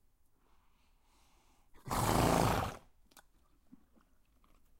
Horse Snort 2
Loud, happy horse snort. Field recording using Tascom DR-5
horse, pony, snort, farm, horses, barn